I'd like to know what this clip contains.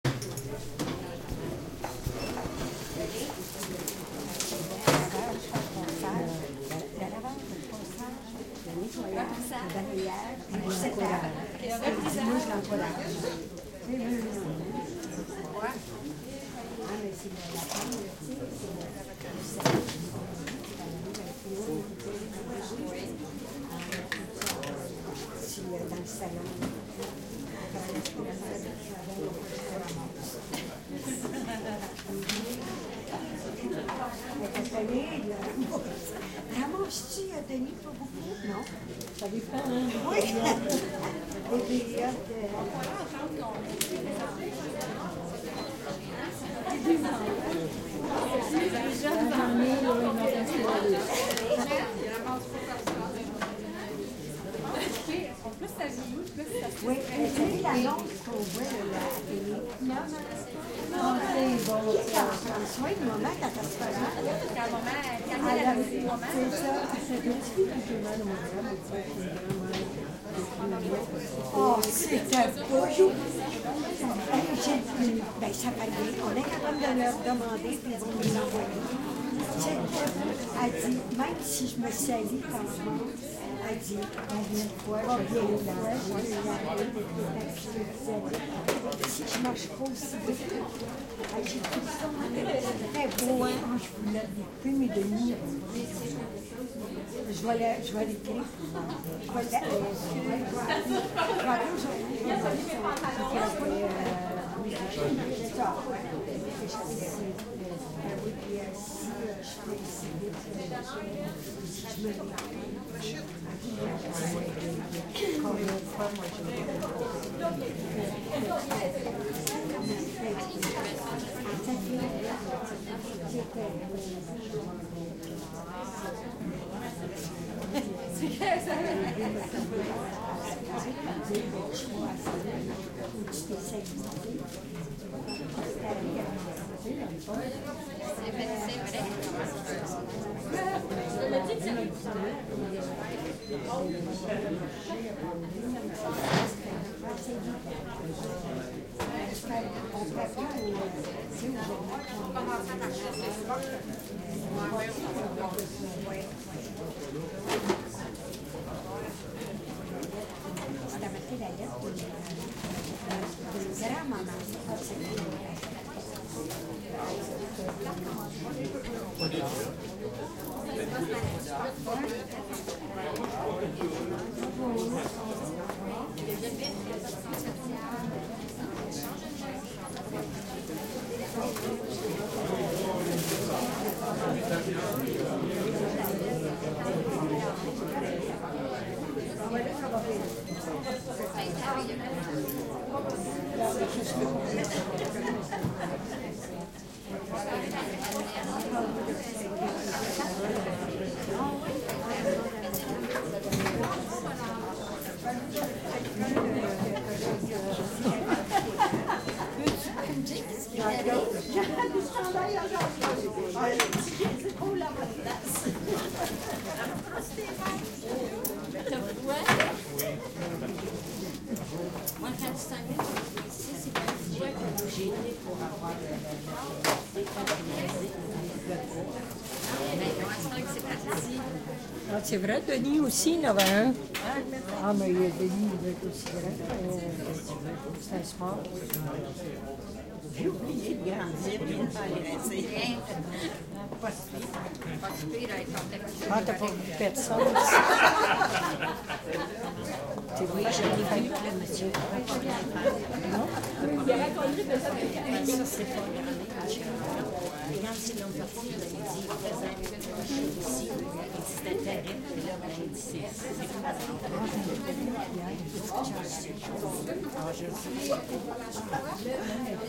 crowd int small medium room blood clinic office quebecois voices Verdun, Montreal, Canada
clinic, int, medium, quebecois, room